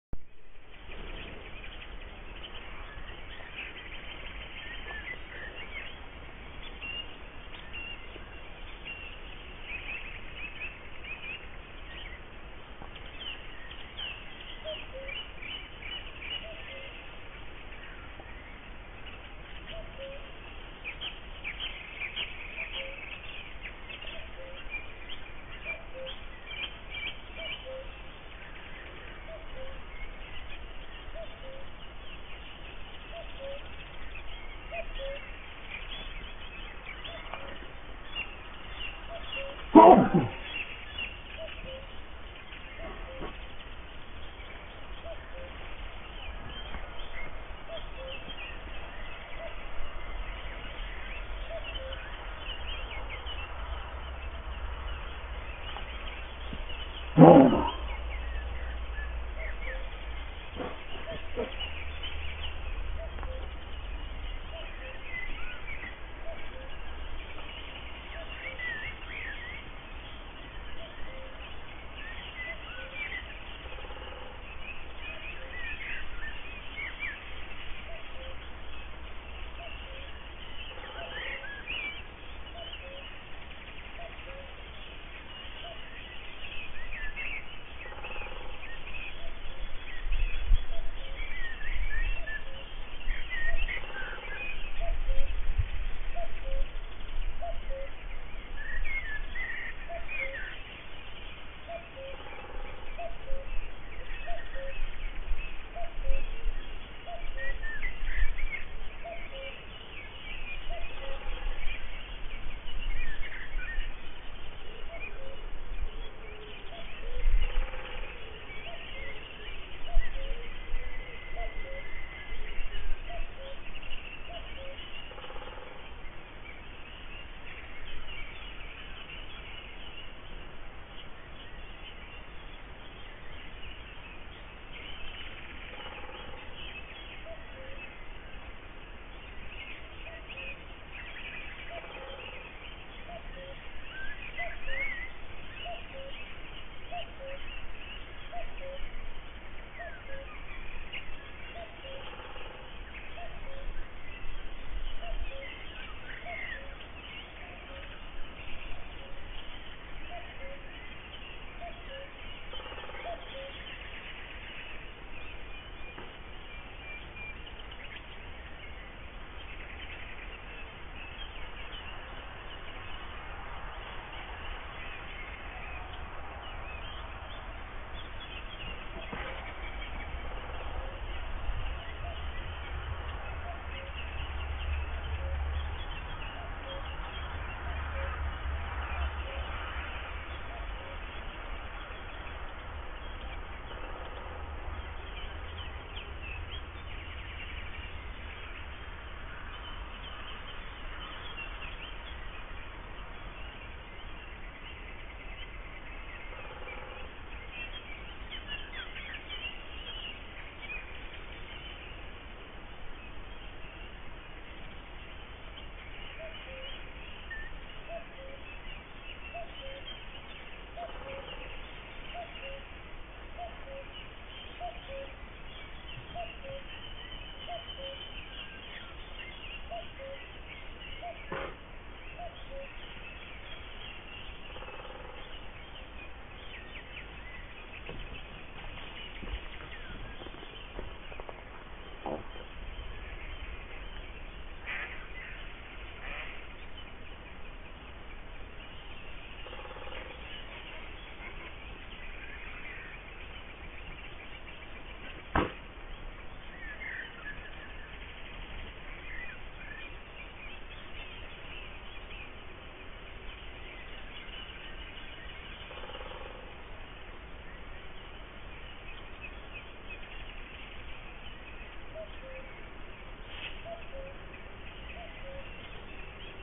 Birds in the morning in may 2003.
Location: Germany, Ketzerbachtal (close to Meißen
Unfortunately I suffer from some allergic sneezes - Gesundheit!
Low tec recording in the field, Pentax Optio S3 camera
germany, morning